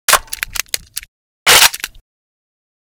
Fumbly Gun Reload
A friend of mines donated some samples to me which contained metallic tones and clacking elements. I decided to fashion them into a reloading gun sound.
guns, clacking